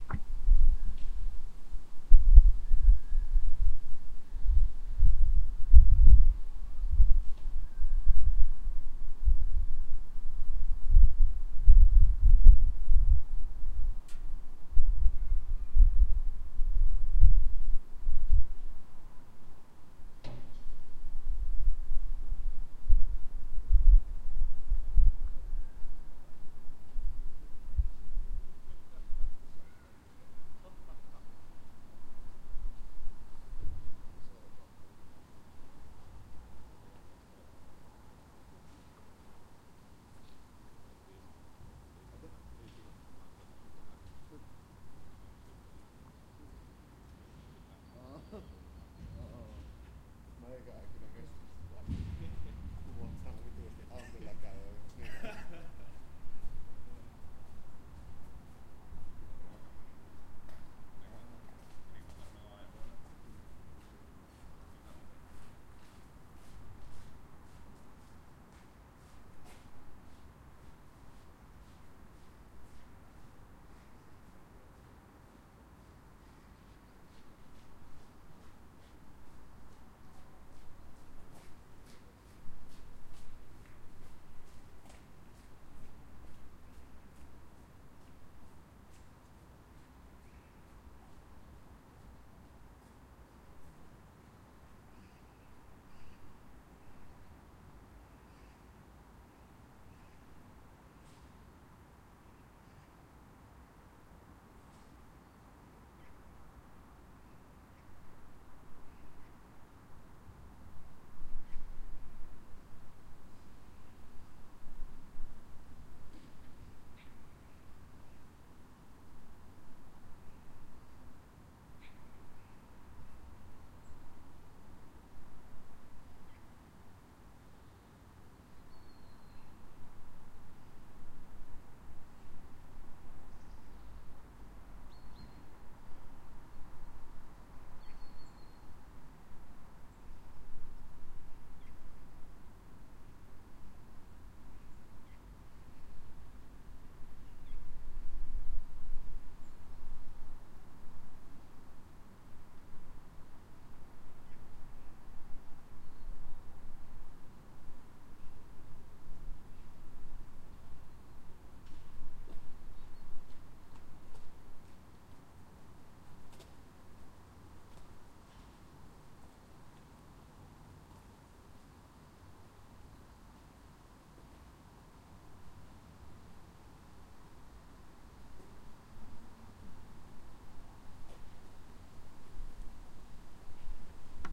The Great Outdoors of Kortepohja
I spend much of my time in the lovely Kortepohja. At times I enjoy sitting on a bench and just listening to the sounds of silence, nature, and the wonderful residents of the area.
voices, field-recording, birds